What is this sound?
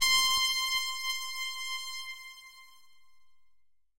120 Concerta trumpet 03
layer of trumpet